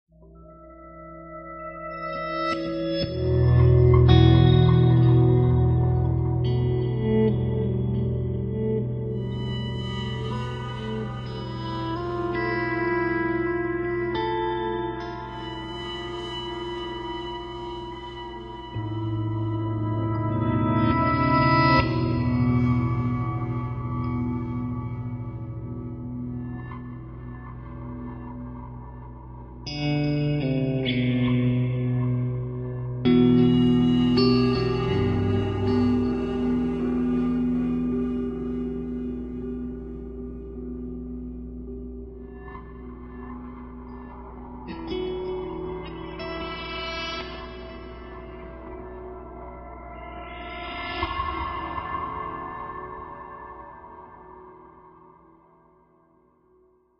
loop meditations no drums
same loop no drums
ambient, loop